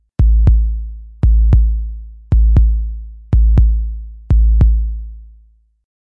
Heartbeat Drum Sound
A heart beat replicated with a bass drum track.
heart-beat, heart, drum, bass, heartbeat, drums